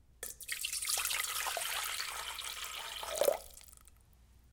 Pouring Water Into Metal Pot or Kettle
Clean recording of water being poured into a metal pot, meant to emulate the sound of water being poured into a kettle/teapot. Would work for pouring any liquid into almost any metal container (metal containers give off a subtle "twang" that isn't generally produced when pouring into other material containers such as glass, ceramic, plastic, wood, etc).
No processing of any kind applied.